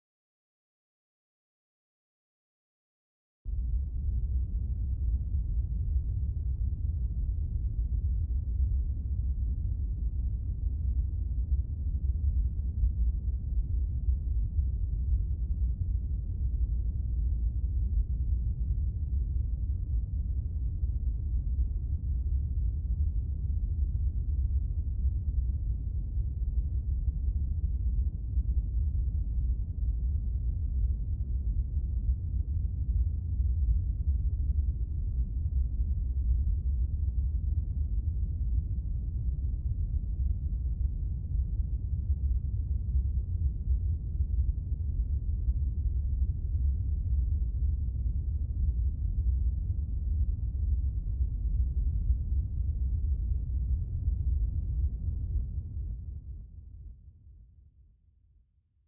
spaceship rumble bg2
made with vst instrument albino
starship, electronic, emergency, dark, hover, ambience, sci-fi, energy, drive, noise, future, futuristic, spaceship, drone, soundscape, sound-design, atmosphere, background, pad, rumble, Room, engine, ambient, impulsion, effect, machine, space, fx, bridge, deep